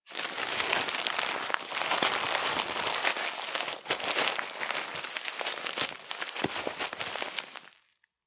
Paper crumple
A piece of old newspapers crumpled up in to a small ball. Recorded with Tascam DR 22WL and tripod.
scrunch, noise, crumple, rustle, rustling, field-recording, paper, sheet, crumpling